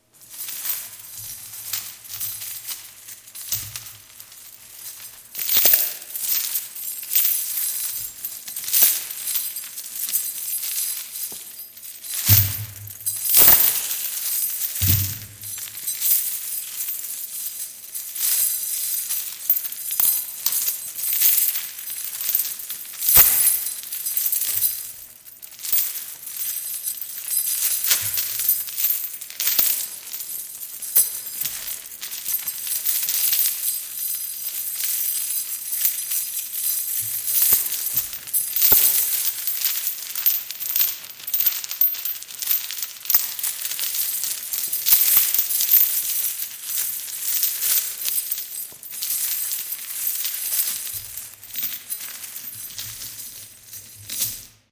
Several different types of chains that are shaken together. Recorded with Audacity.